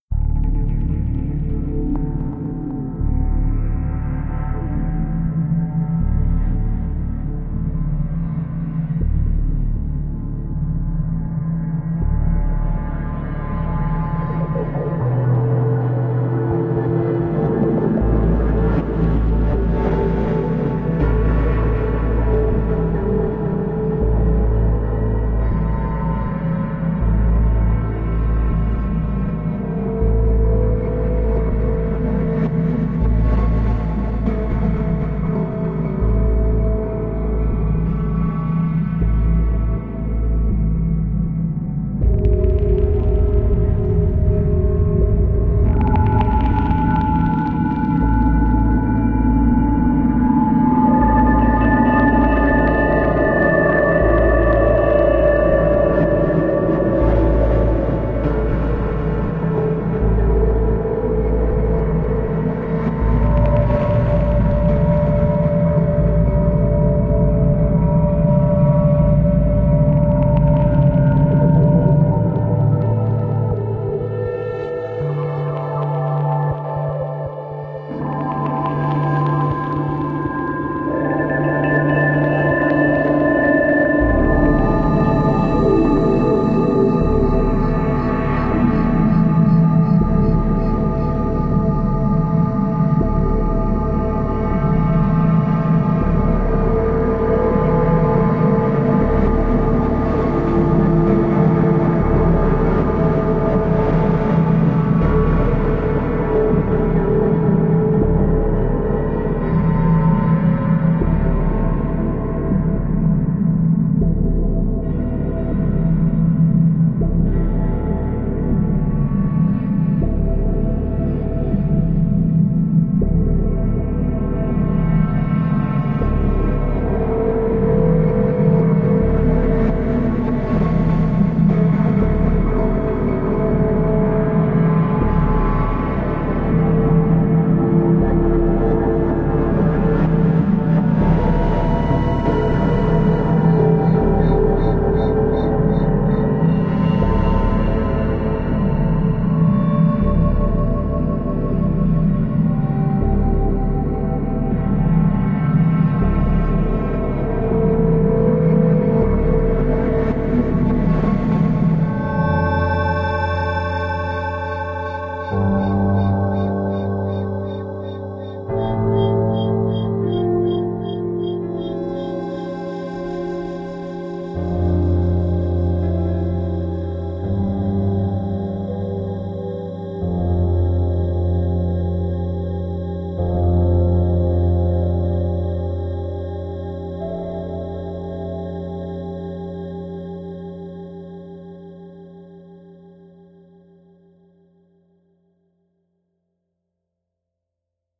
industrial sky
Grey smoke coming from the factory complex is turning into the clouds covering the sky... Where are all the colors?
grey, cinematic, film, sky, scary, long, sci-fi, soundscape, walk, factory, soundtrack, music, free, slow, game, dark, ambient, journey, atmospheric, industrial, creepy, movie, dynamic, rhytmic, ecology